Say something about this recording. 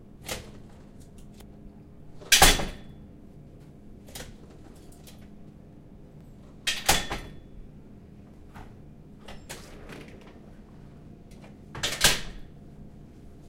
Heavy steel door opening and closing
H4, shut, door, doors, zoom